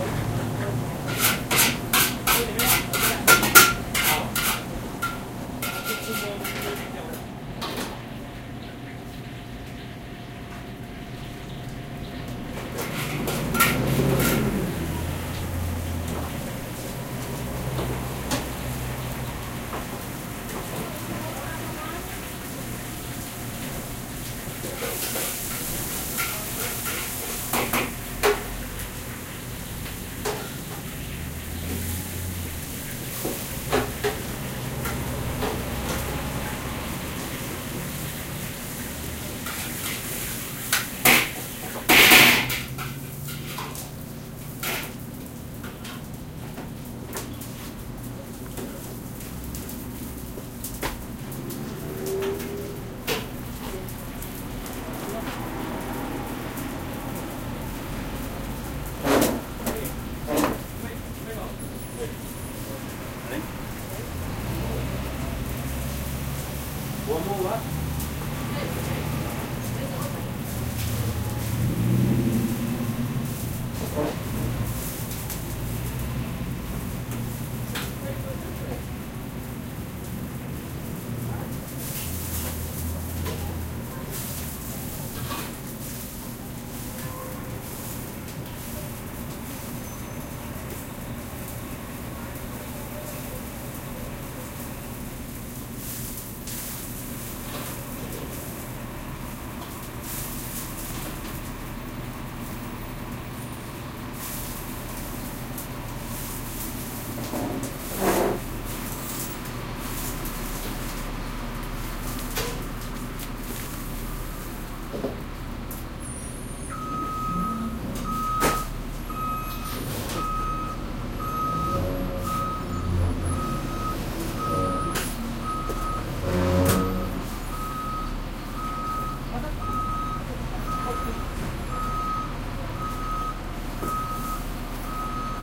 A restaurant on the Upper East Side called 5 Luck. Couple loud pans sounds got clipped, but it sounds masked. Interesting environment.